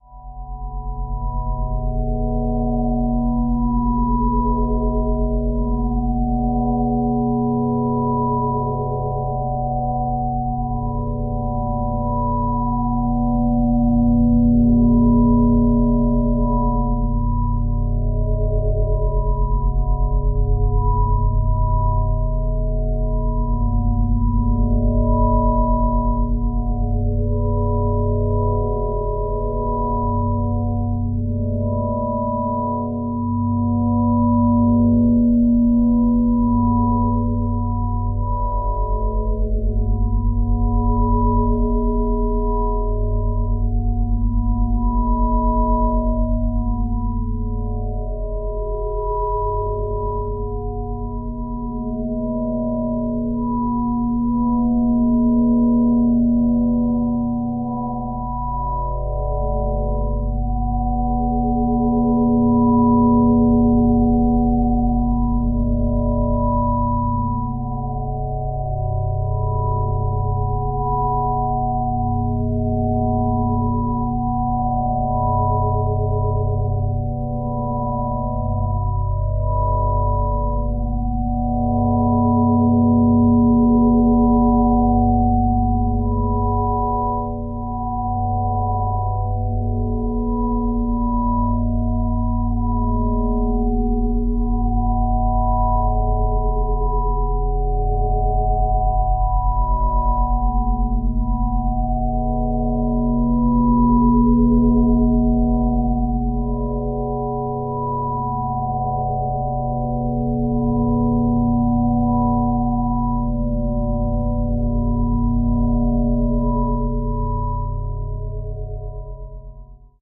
This sample is part of the "SineDrones" sample pack. 2 minutes of pure ambient sine wave. Resembling singing bowls due to some high resonances.